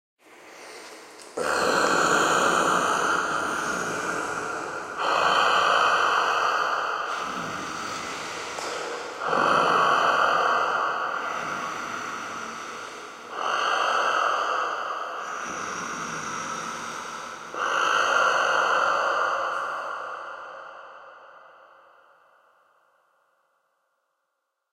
Ominous Heavy Breathing
Imagine a large creature at rest, you've suddenly walked into its cave and you hear this, OH NO!
Anyway, use it for whatever you feel like :)
(Unmastered)
Animal, Beast, Breathing, Dragon, Heavy, Large, Rest